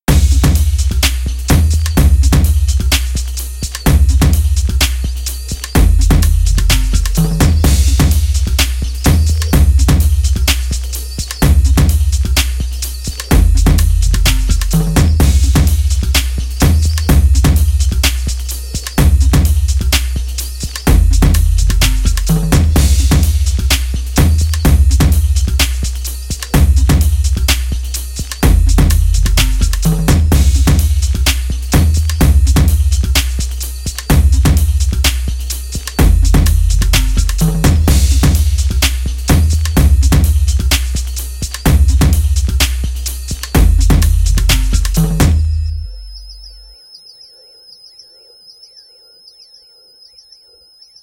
Dirty Dubstep Drumloop (127BPM)
Dirty Dubstep Drumloop by Lord Lokus
127BPM, Dirty, Drumloop, Drums, Dubstep, Electro